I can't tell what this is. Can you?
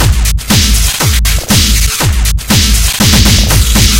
Thank you, enjoy